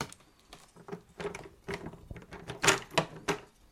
Rummaging through objects
clatter, objects, random, rumble, rummage